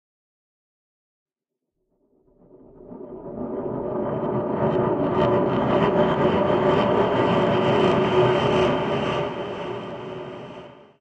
Dark Rise Upgrading
Sonido que brinda la sensación de que algo se aproxima o va a suceder